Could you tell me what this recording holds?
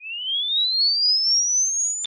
game start
The sound of a player spawning in a level.
sound, digital, game